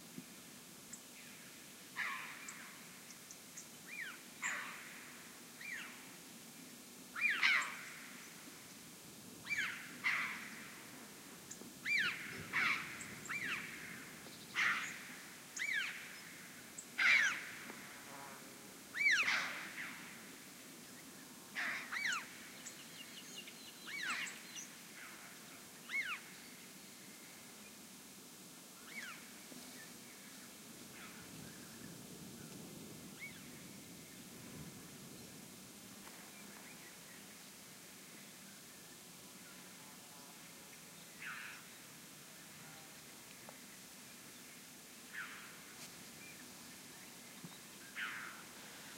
20170217 05.chough.single
Callings from one Red-billed Chough in flight. Recorded at the mountains of Sierra de Grazalema (S Spain) with Primo EM172 capsules inside widscreens, FEL Microphone Amplifier BMA2, PCM-M10 recorder.